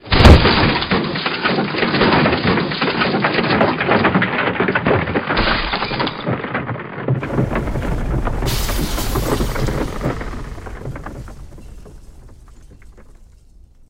Scaffold Collapse Mixdown
We used this effect to mimic Masterbuilder Solness falling from his new house spire at the end of Ibsen's play; breaking the wooden scaffolding as he did so. We couldn't persuade the actor to do it for us, as he had work the next day!
This is a mixdown of impact recordings, BBC and own, to create the sound we wanted.